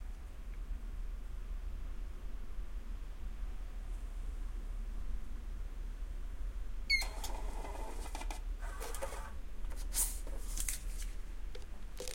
Digital machine (Raining Outside)
Digital finger scan and paper leaving (Raining outside office). Recorded with Tascam DR-03
digital, machine, office